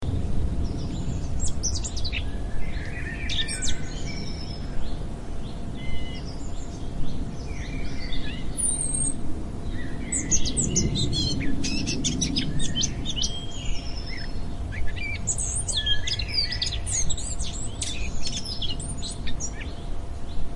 21 Renato Mendes Mod 4 exer 2 e
music, sound, work